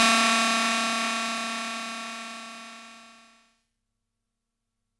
The Future Retro 777 is an analog bassline machine with a nice integrated sequencer. It has flexible routing possibilities and two oscillators, so it is also possible to experiment and create some drum sounds. Here are some.
ride, futureretro, analog, fr-777